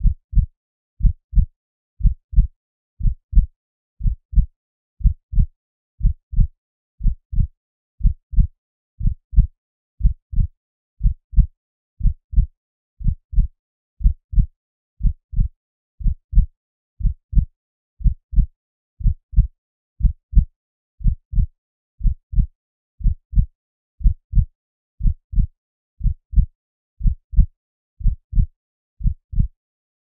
heartbeat-60bpm
A synthesised heartbeat created using MATLAB.